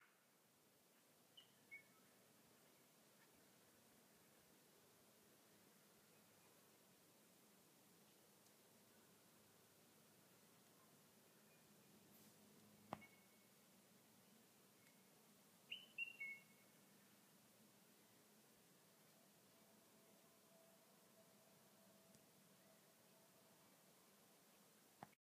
A sound of bird tweets and chirps.
Chirp, Bird, Tweet